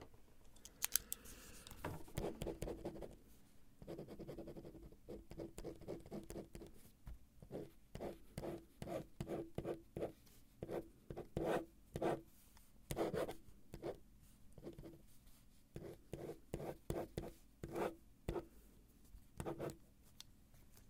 Writing and scratching around with a pen on a single piece of paper on a wooden desk. Recorded with a Neumann KMi 84 and a Fostex FR2.
desk, drawing, paper, pen, wooden, write, writing